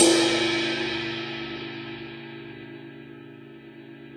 CC17-ZAMThn-Ed~v02
A 1-shot sample taken of a 17-inch diameter Zildjian Medium Thin Crash cymbal, recorded with an MXL 603 close-mic and two Peavey electret condenser microphones in an XY pair. The cymbal has a hairline crack beneath the bell region, which mostly only affects the sound when the edge is crashed at high velocities. The files are all 200,000 samples in length, and crossfade-looped with the loop range [150,000...199,999]. Just enable looping, set the sample player's sustain parameter to 0% and use the decay and/or release parameter to fade the cymbal out to taste.
Notes for samples in this pack:
Playing style:
Bl = Bell Strike
Bw = Bow Strike
Ed = Edge Strike
1-shot, multisample, cymbal, velocity